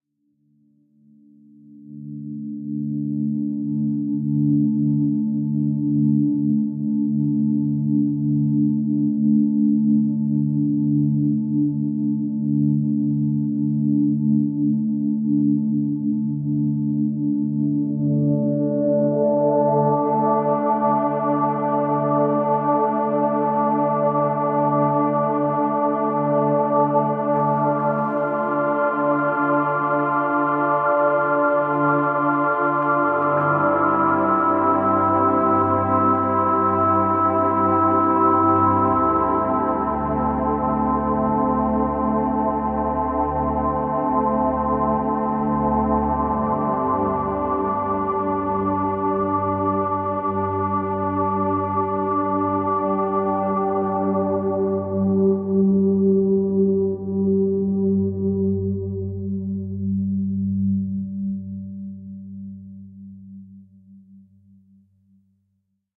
short piece of a synth testing session, I like it.